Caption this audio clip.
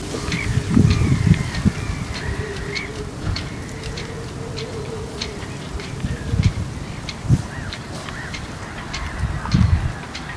Wind slapping rigging against ship masts, tools running in the distance. Sea gull calls in the distance.
Recorded at Fambridge Yacht Haven, Essex using a Canon D550 camera.
marine, mast, yard, wind, ship, field-recording, power-tools, boat, slap, rhythm, yaght, sea, repeat, rigging